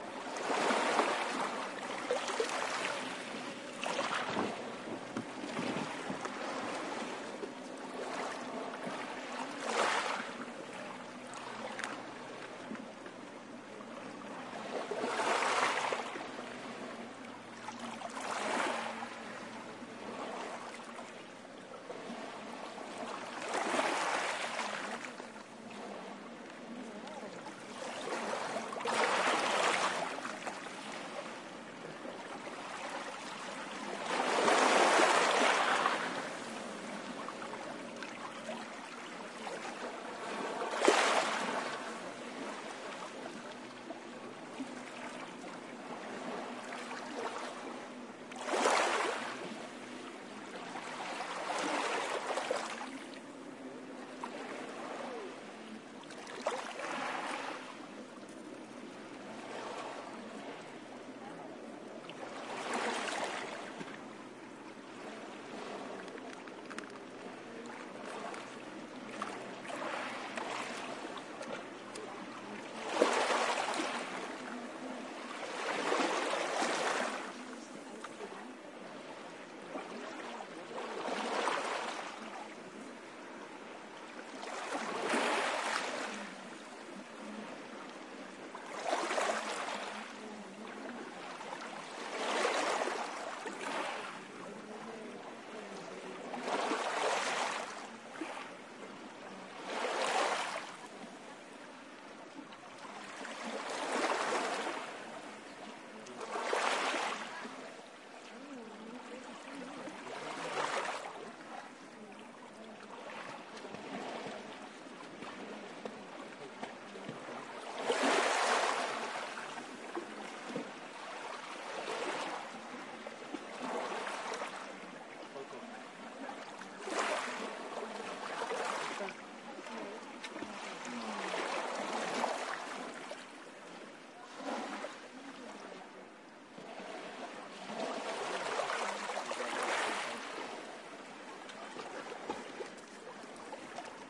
soft waves breaking in a inlet, with some talk from people and a distant motorboat. Recorded near Las Negras (Almeria, S Spain) using Shure WL183, Fel preamp, PCM M10 recorder